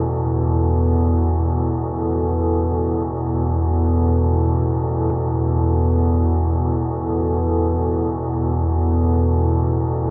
s piano fours pad loop
Should be almost seamless.
loop, pad, piano, synth